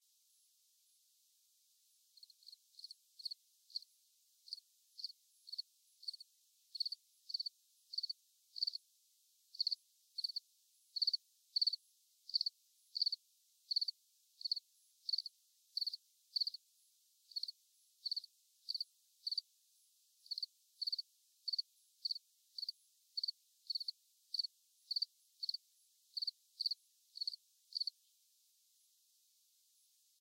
Cricket chirping
Crickets chirping at night